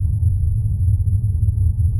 Sounds from a small flash game that I made sounds for.